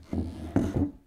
Interior recording of a chair/stool being moved sliding on the floor.
stool, chair, stand, sit, interior, household, scrape